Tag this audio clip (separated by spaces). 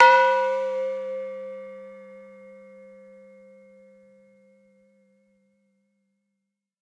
percussion bell tubular-bell